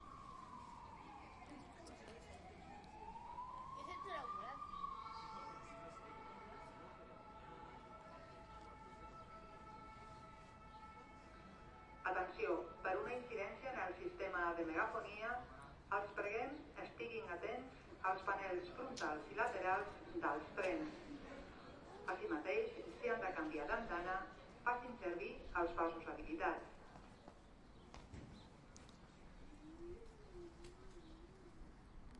Granollers-Canovelles. It's a winter day and some birds are singing. The sound system is announcing a failure.
Granollers-Canovelles. És un dia d'hivern, podem escoltar els ocells cantant, el sistema de megafonia anuncia una averia.
train, station, Granollers, field-recording, announcement, sound